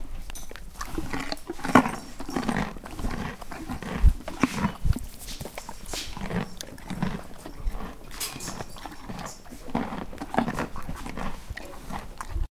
Horse eating carrot
eating, carrot, horse